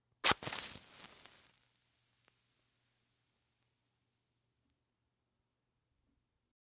match strike 04
Striking or lighting a match!
Lighting a match very close to a microphone in a quiet place for good sound isolation and detail. One in a series, each match sounds a bit different and each is held to the mic until they burn out.
Recorded with a Sennheiser MKH8060 mic into a modified Marantz PMD661.